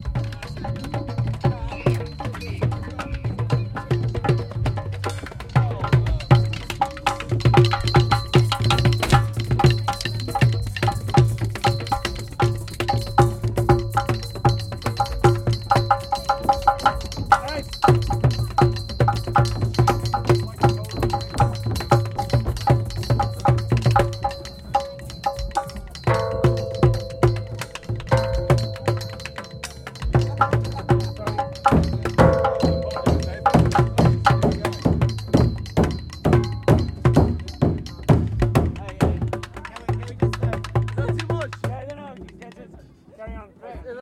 Trash drumming at Sark Roots Festival 2016 (pt7)
Trash drumming at Sark Roots Permaculture Festival 2016.
Recording of a set of interesting recycled objects mounted on scaffolding in the middle of the festival site. Recorded whilst festival was in full swing around the wildly improvising (mostly) amateur drummers on Saturday night
Recorded with a Tascam DR-40 portable recorder. Processing: EQ, C6 multi-band compression and L3 multi-band limiting.
Sark, Trash, Roots, Group, Festival, Drumming